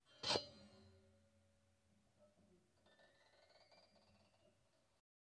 Inside a piano with contact mic, strum followed by a tinkling sound